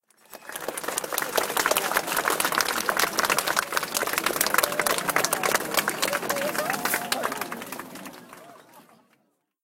A crowd applauding and cheering. This was actually taken from a recording on my iPhone with Voice Memos.